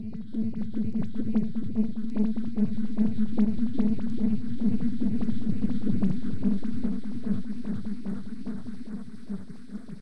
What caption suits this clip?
Space Ship Sound Design